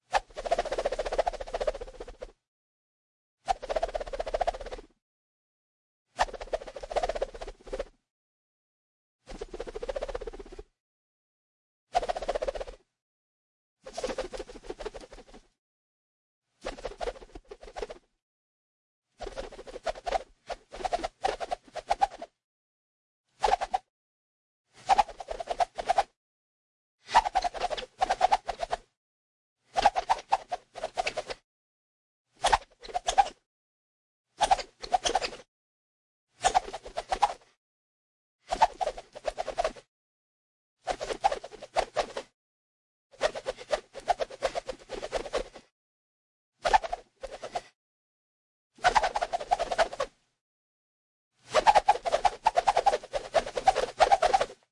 Whoosh Flurry 1
For the fighter who is fast with his or her mighty fists that can give enemies rapid punches to the guts!
(Recorded with Zoom H1, Mixed in Cakewalk by Bandlab)
Fast, Fighting, Swish-Swash, Whoosh